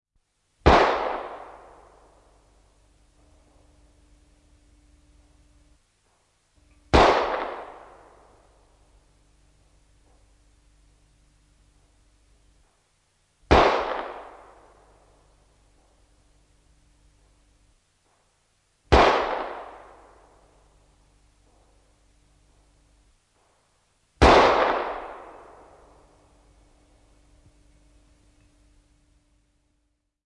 Pistooli, laukauksia / Old pistol, a few shots, exterior
Vanha pistooli, ammutaan muutama laukaus. Ulko.
Paikka/Place: Suomi / Finland / Kirkkonummi, Porkkala
Aika/Date: 15.03.1957
Field-Recording, Gun, Laukaus